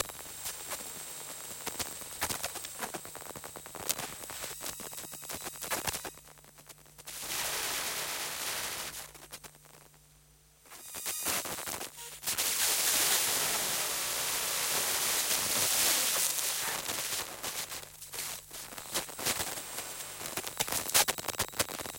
Ambience Monitor Static Loop 01
An electric static ambience loop sound to be used in sci-fi games, or similar high tech sounding games. Useful for envionments with computers, or televisions, for making an eerie feeling of abandonment while the main character is unraveling big secrets.
ambience, atmosphere, computer, electronic, gamedev, gamedeveloping, gaming, indiedev, loop, sci-fi, sfx